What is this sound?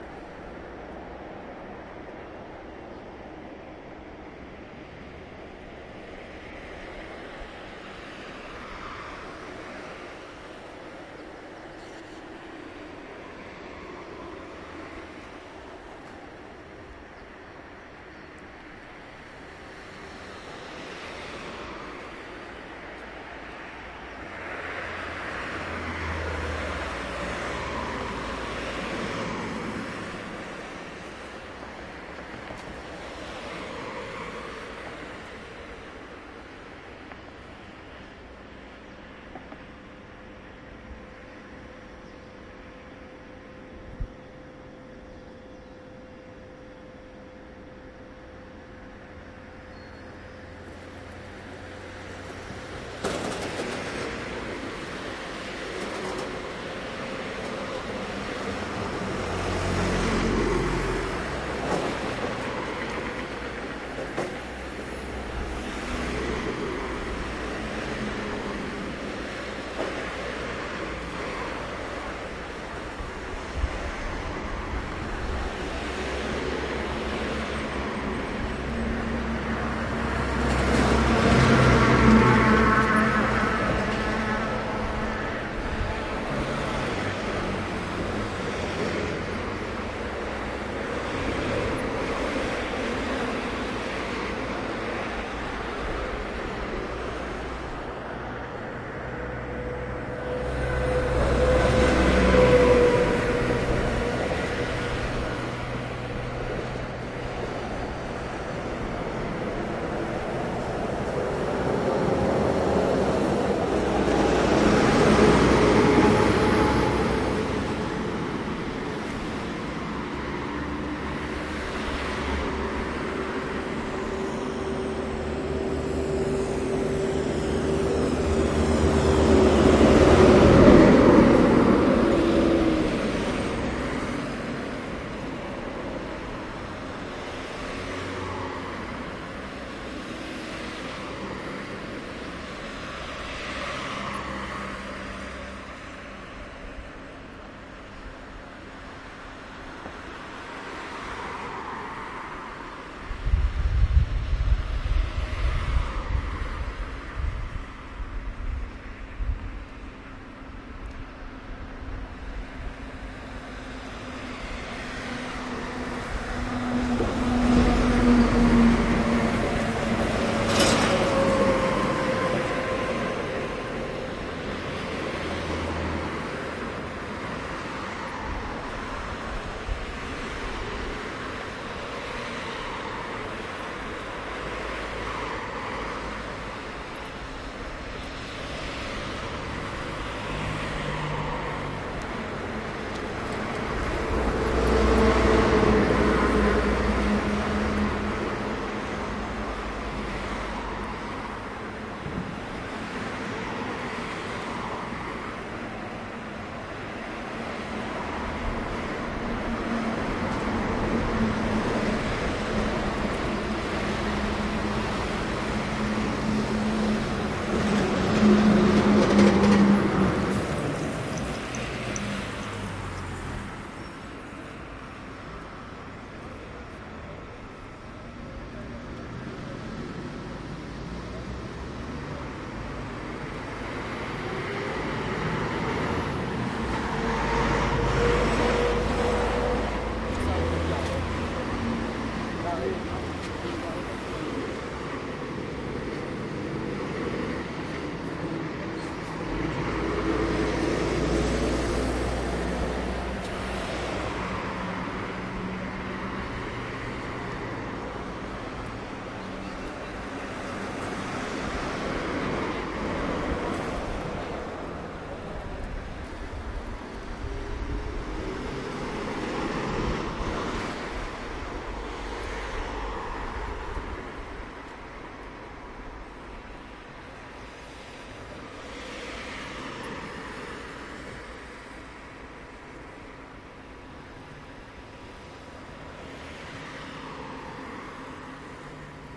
Cars, busses and people around. Recorded on a busstop near Catholic university in Ruzomberok, Slovakia.
car, bus, busstop, stereo